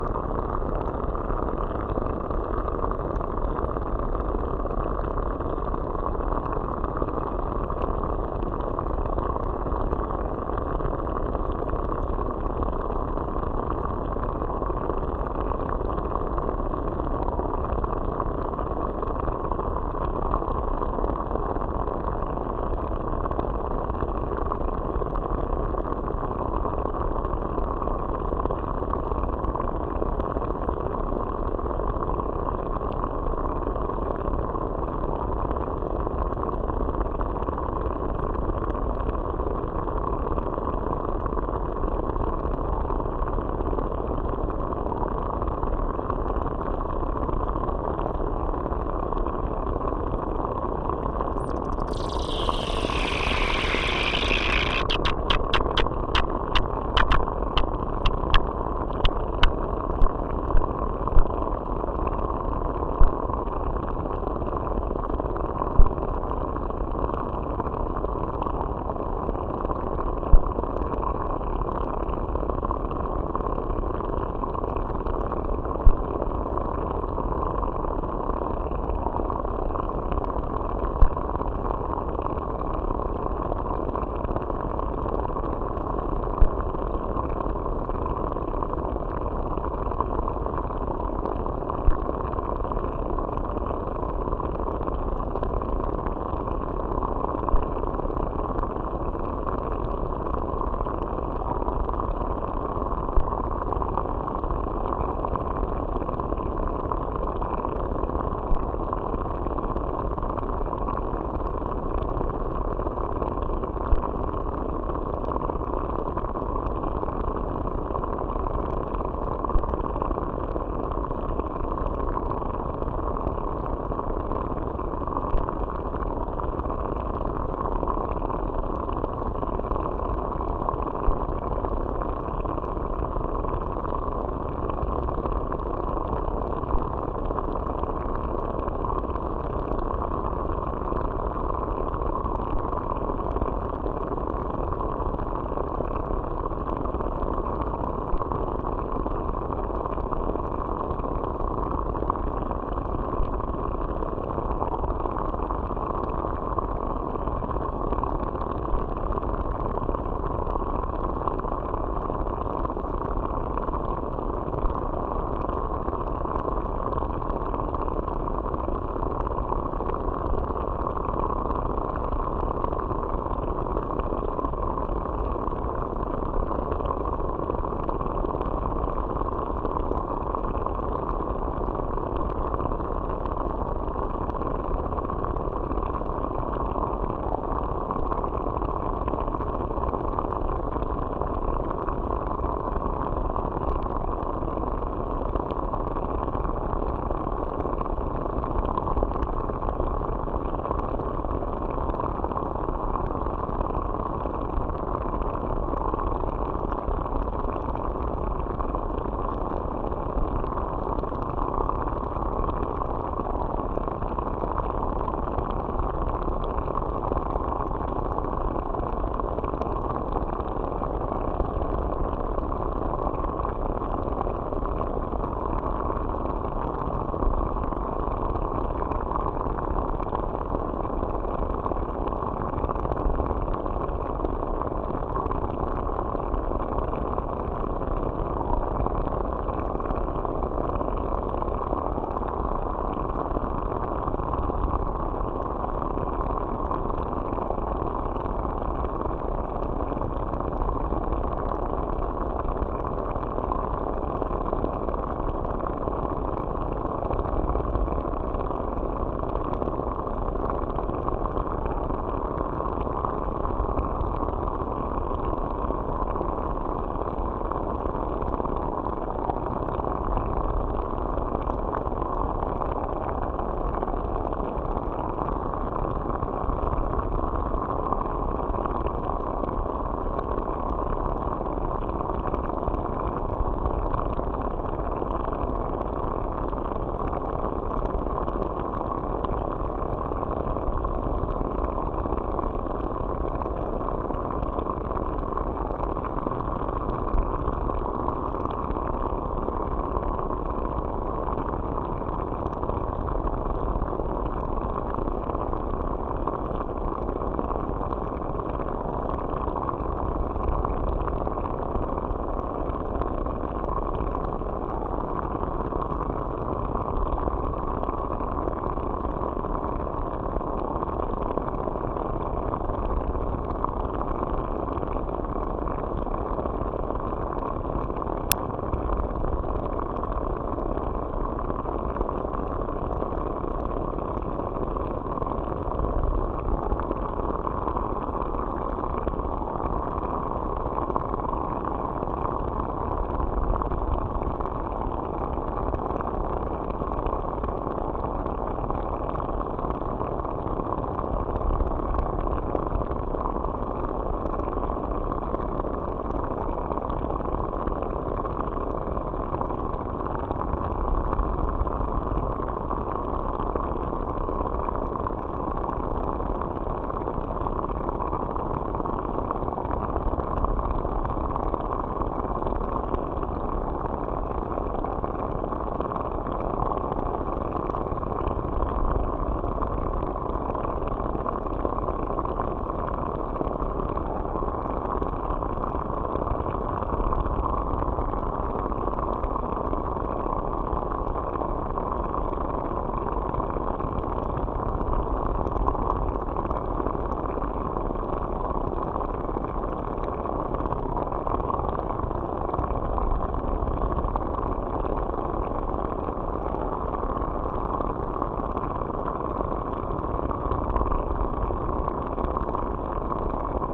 Geothermal Hotpot
Hydrophone recording of geothermal “hotpot” (hot spring) along the Laugavegur Trail in the Landmannalaugar region of Iceland. Recorded in July 2014.